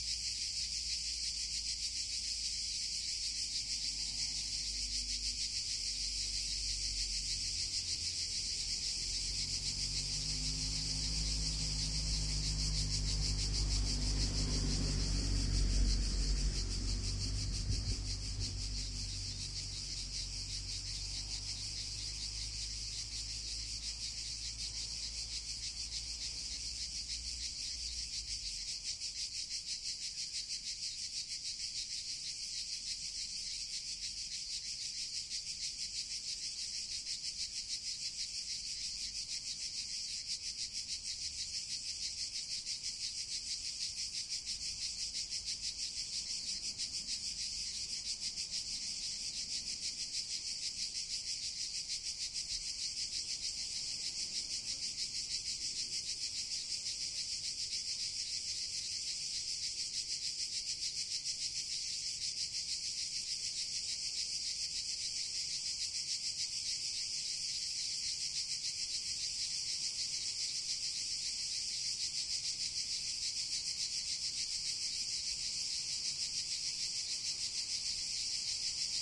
Surround recording of a trail near the Croatian town of Brela. It is a sizzling hot summer noon, crickets are chirping, in the beginning of the recording, a truck can be heard driving down an adjacent road.
Recorded with a Zoom H2.
This file contains the front channels, recorded with a mic-dispersion of 90°